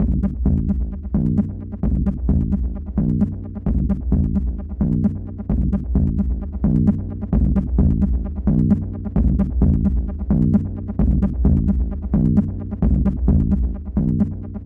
motion delay sound 4
sound crested in ableton with motion delay effect.